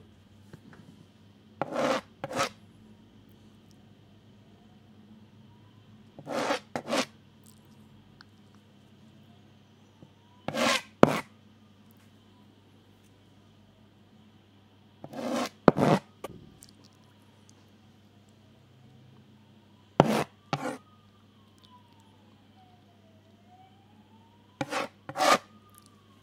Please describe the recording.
cutting board 02
Scraping a large knife against a plastic cutting board (but it works as a wooden one as well). I recommend cutting the low end off
kitchen, salad